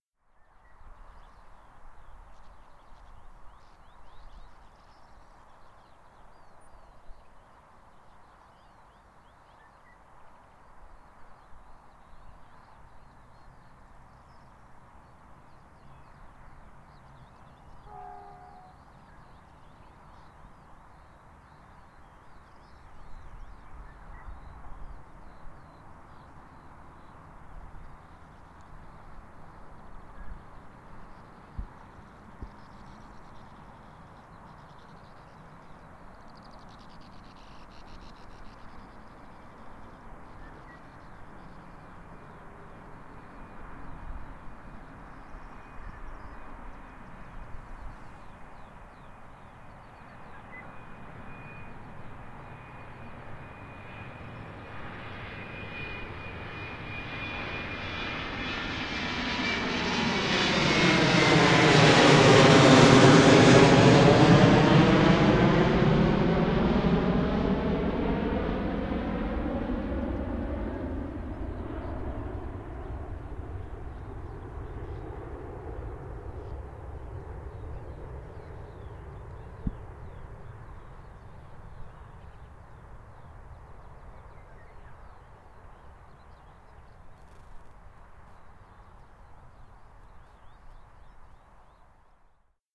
The recording was made on 30. 05. 2022. in Budapest, Liszt Ferenc International Airport. Not the best quality but usable.